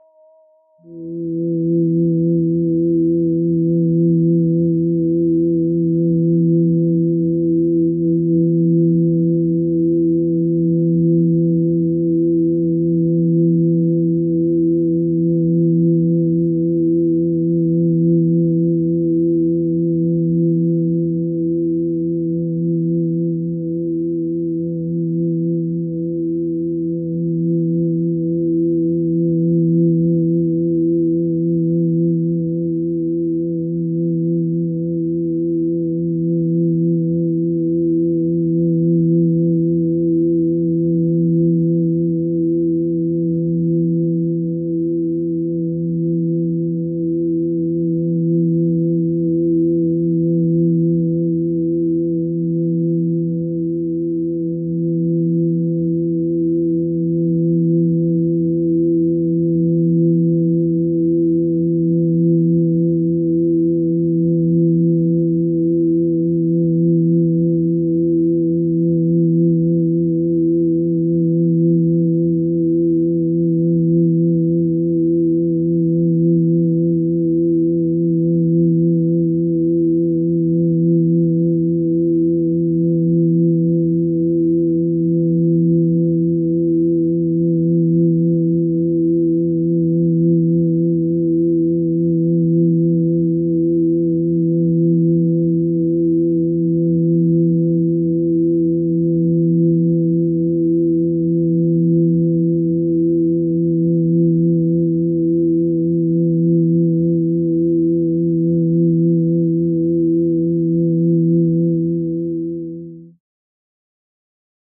A Phase drone sound in the key of A. Made in ZynAddSubFX, a software synthesizer software made for Linux. This was recorded through Audacity 1.3.5 beta, on Ubuntu Linux 8.04.2 LTS.